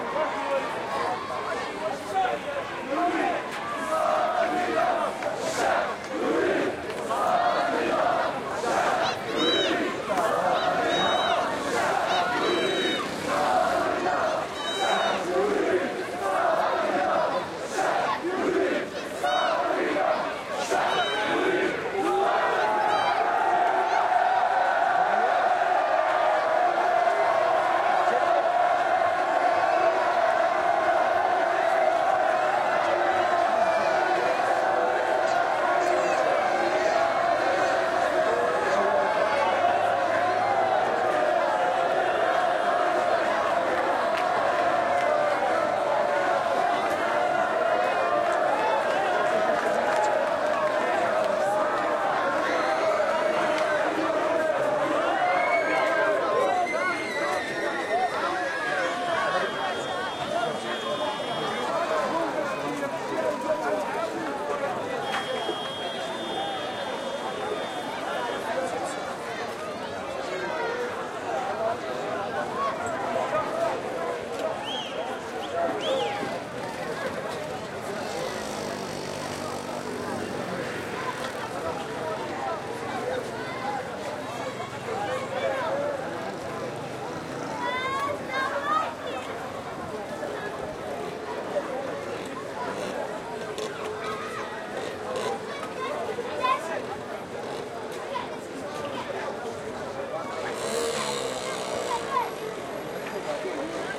this is a recording of a protest from the arab spring in Tunisia